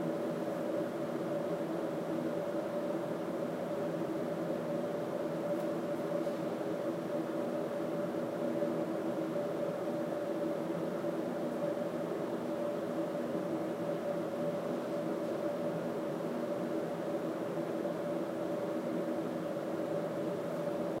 atmo drone4
Yet another record from the subway.
atmosphere, field-recording, industry, ambient, mono, drone, subway